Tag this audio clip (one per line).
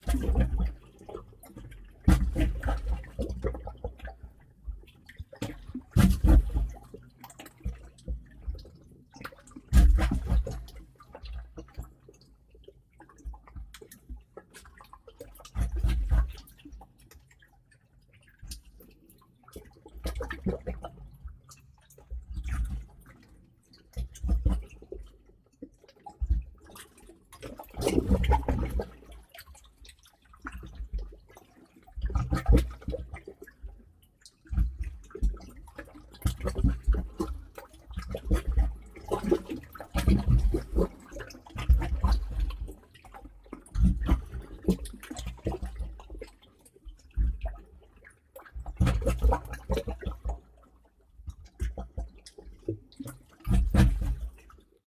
rocks
water
bump